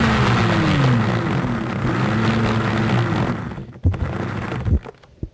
Digit Drill
digital, fx